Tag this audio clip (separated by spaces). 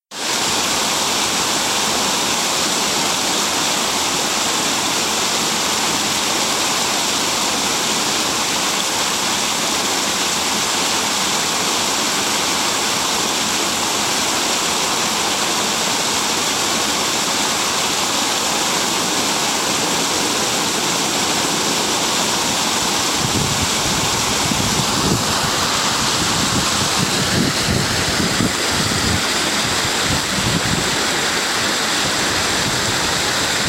ambiance field field-recording nature soundscape water waterfall